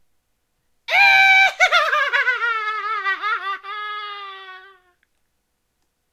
evil laugh-01
After making that mash up with analogchills scream i got bored and well decided to make a evil laughs pack. Seeing as the evil laughs department here is a touch to small.
evil laugh male horror scary frightening insane psychotic For_Science!
male, frightening, insane, scary, ForScience, horror, laugh, psychotic, evil